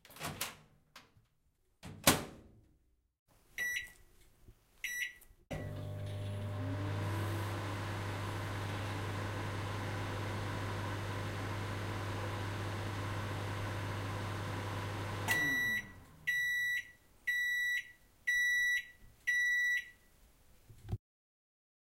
KitchenEquipment Microwave Stereo 16bit
messing with the microwave